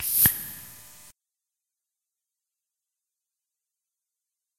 airpipe swoosh 03
sound of pipe